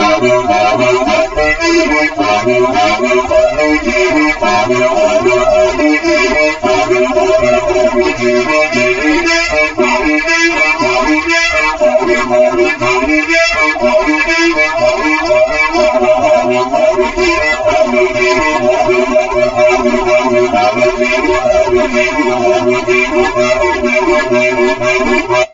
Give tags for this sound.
composing melody Music small

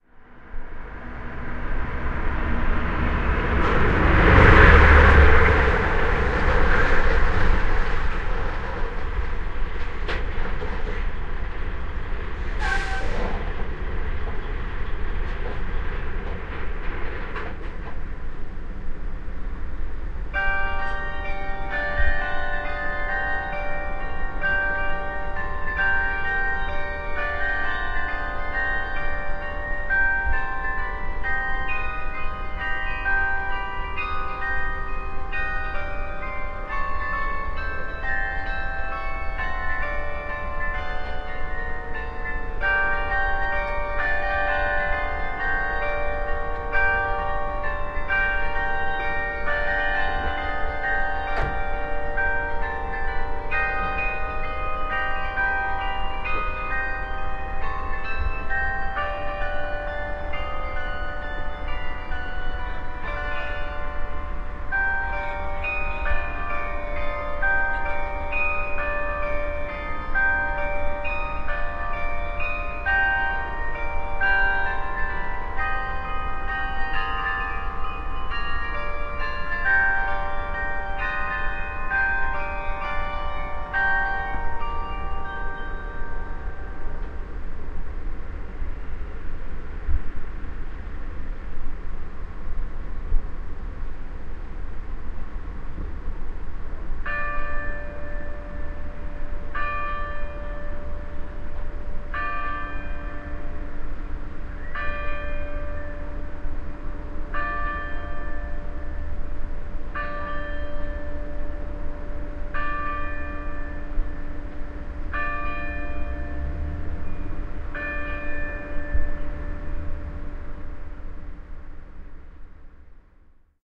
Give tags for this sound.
ambiance bell chime clock Eindhoven engine fieldrecording soundscape truck

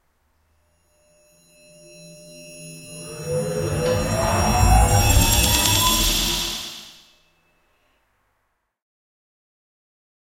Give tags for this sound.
digital future glitch sci-fi sound-design sounddesign timetravel

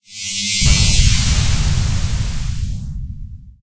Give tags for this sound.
laser scifi explosion

